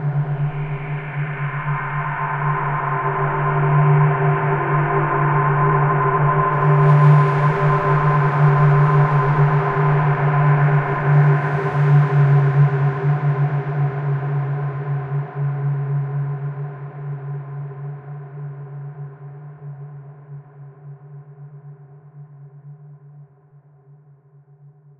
Flangy overdriven space soundscape. Created using Metaphysical Function from Native Instrument's Reaktor and lots of reverb (SIR & Classic Reverb from my Powercore firewire) within Cubase SX. Normalised.